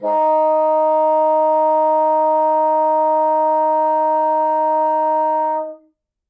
One-shot from Versilian Studios Chamber Orchestra 2: Community Edition sampling project.
Instrument family: Woodwinds
Instrument: Bassoon
Articulation: sustain
Note: D#4
Midi note: 63
Midi velocity (center): 95
Microphone: 2x Rode NT1-A
Performer: P. Sauter